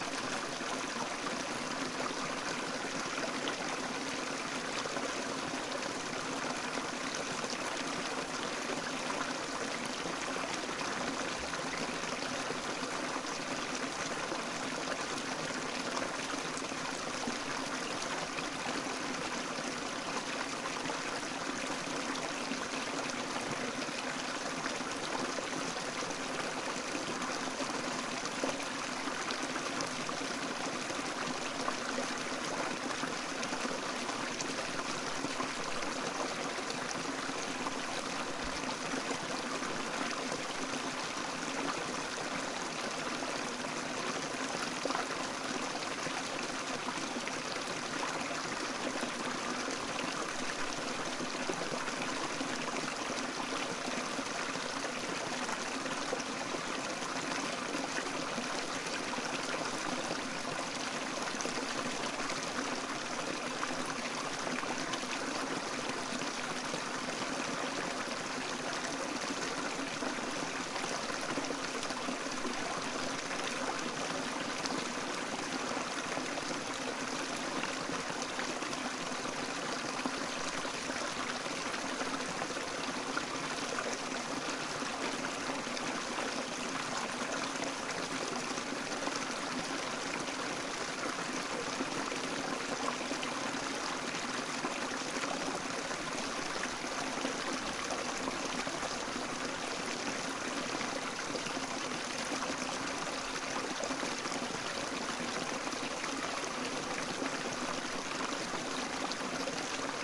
Babbling brook, closeup. This sample has been edited to reduce or eliminate all other sounds than what the sample name suggests.
field-recording, brook, closeup